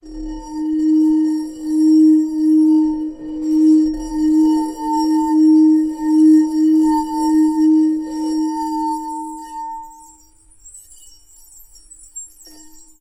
Tono Corto 13sec
bohemia glass glasses wine flute violin jangle tinkle clank cling clang clink chink ring